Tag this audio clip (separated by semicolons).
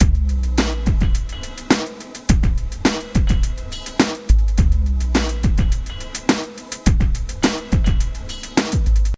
dance; funk